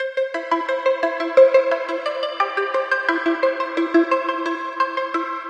ambient flurry
synth, ambience, pad